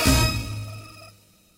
Selecting right answer - speed 3
correct, game, right, selection, stab